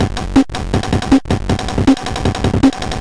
Mangled drum loop from a circuit bent kid's keyboard. Only slightly crunchy.